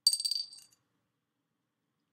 seeds (cristal jar)5
Seeds poured into a cristal jar
jar, seeds, cristal, coins